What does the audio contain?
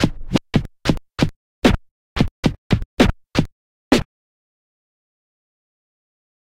Scratched Beats 001
Scratching Kick n Snare @ 111BPM